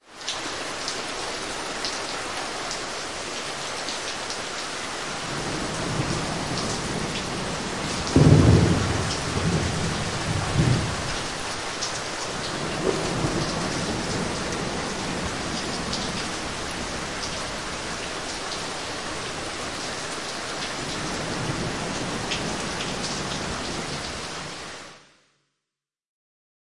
audio samples wide rain
recorded rain on three different locations and mixed them up in a wide stereo image